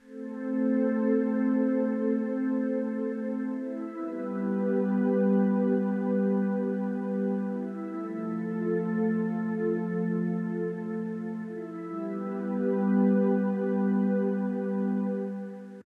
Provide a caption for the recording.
Korg Pad Subtle
Soft synth pad recorded from a MicroKorg. Slightly church-organ-like.
synth, soft, electronica, pad, ambient